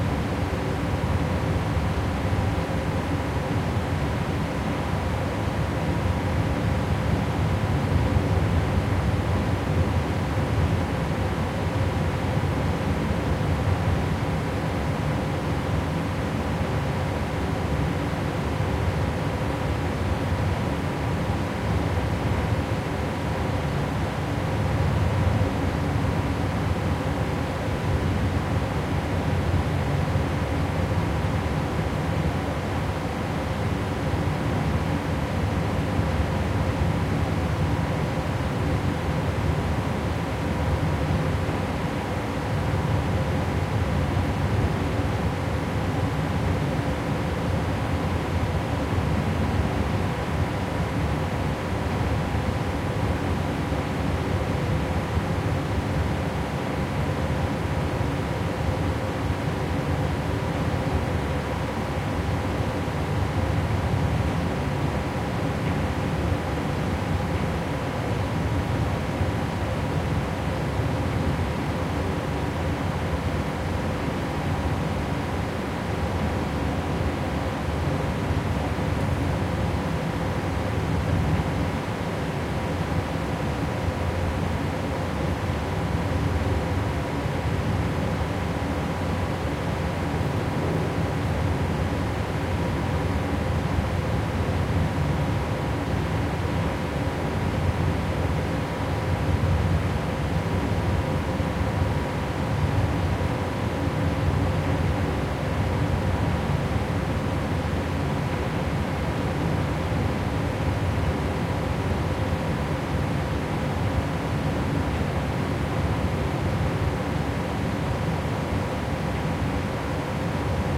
Inside the car deck of a medium sized passenger ferry underway to the Island of Öland in Sweden. The deck is open to the sea on the fore and aft sections of the ship, so some sea chop can be heard in the rear. Drone of the diesel motors with a full frequency range dominates.
Recorded with a Zoom H2N. These are the FRONT channels of a 4ch surround recording. Mics set to 90° dispersion.